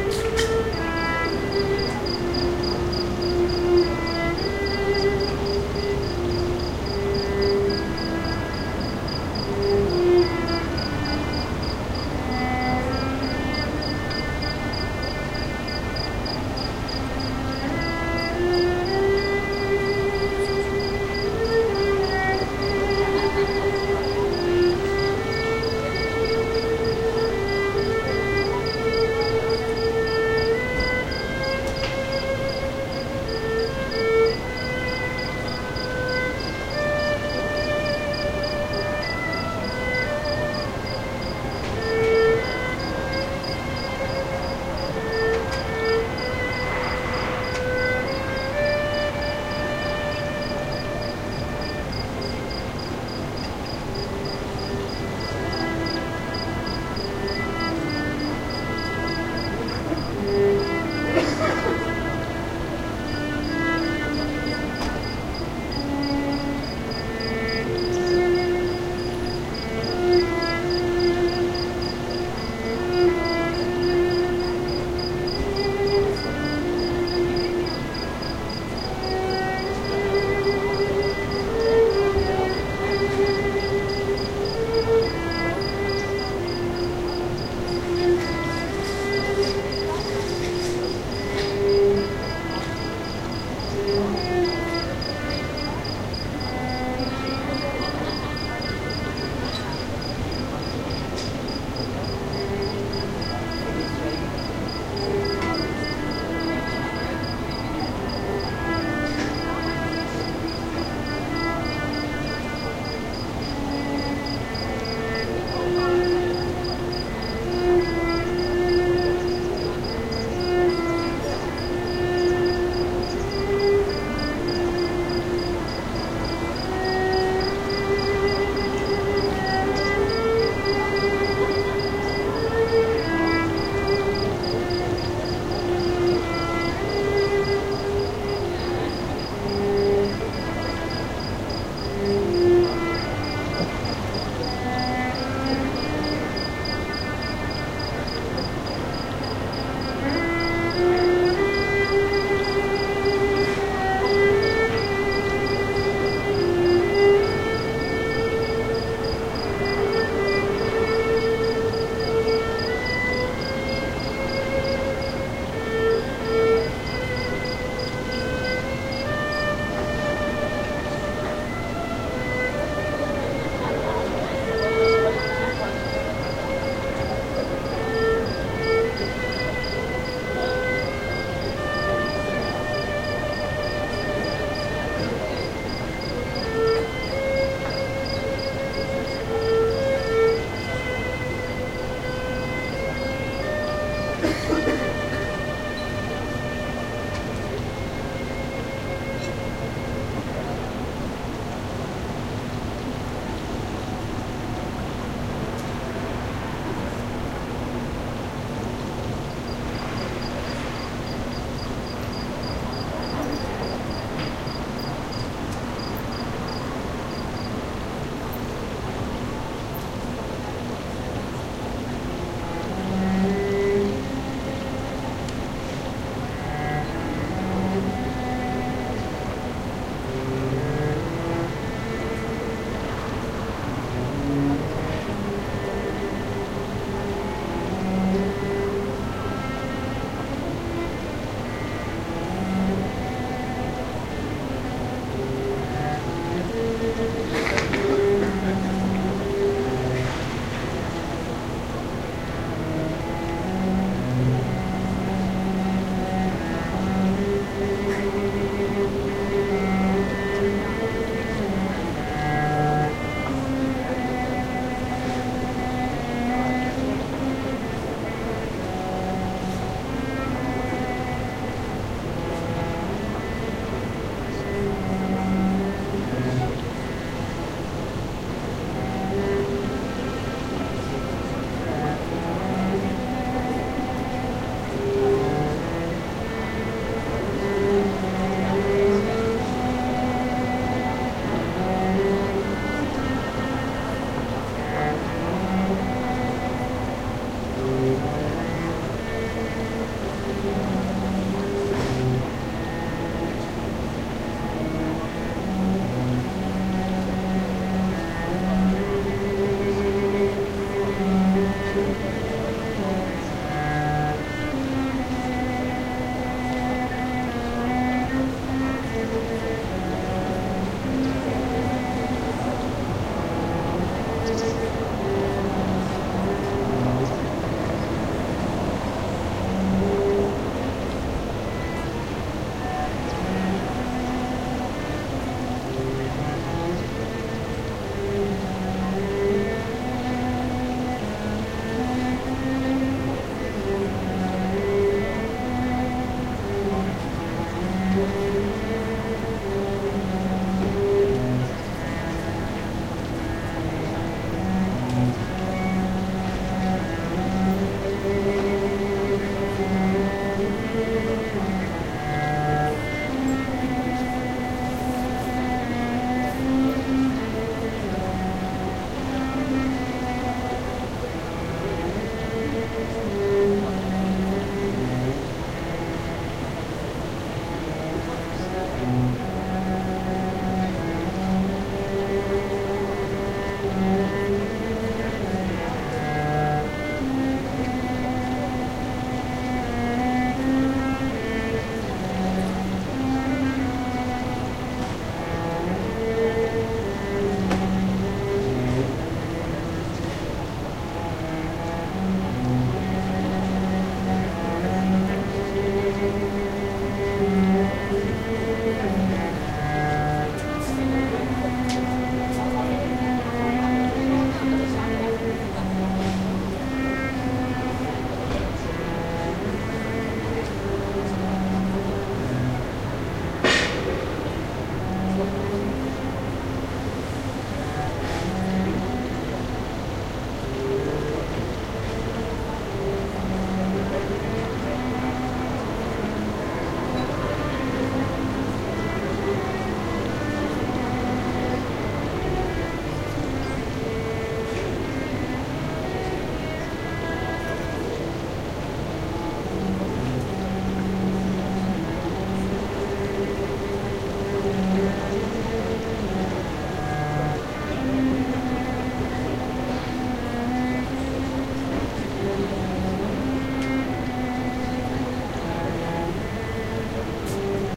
Recorded on evening 23 th October 2008 from 4 th floor balcony in hotel Rixos Premium Belek Turkey withZoom H 2 recorder .